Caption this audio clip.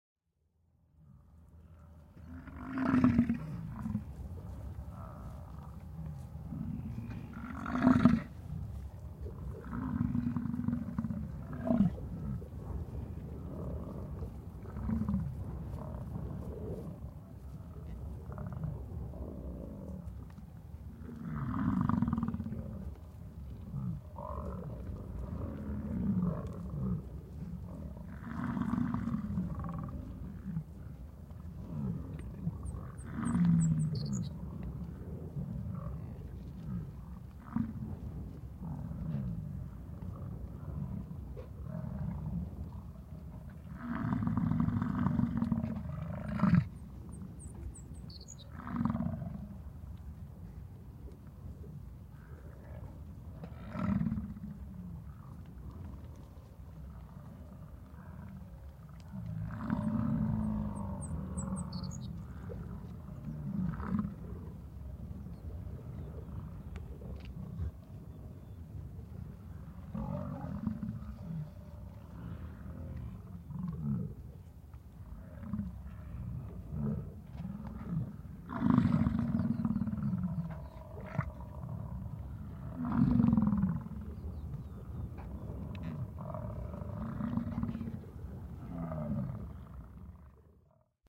Bison - Yellowstone National Park
a recording from the sound library of Yellowstone national park provided by the National Park Service